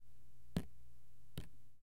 Water On Paper 03
Drops on paper.
drip, dripping, drop, drops, paper, water